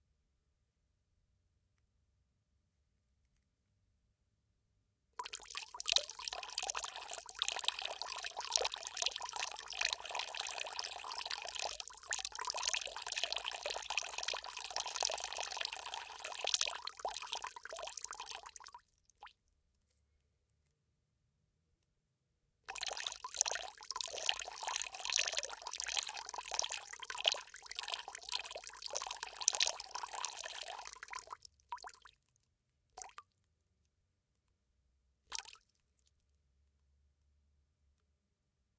water squirt recorded to sound like a pee.